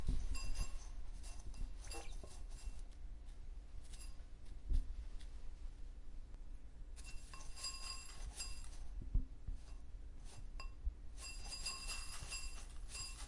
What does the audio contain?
Sherry - Evening Eating - Bowl Sounds - F#
My bird uses its feet a lot when its sitting inside its bowl eating bird seed, the noise is quiet but I found it interesting. Audacity says this one is f#. The next Sherry sound is likely to be the sound of her attempting to fly unfortunately she is a flightless bird.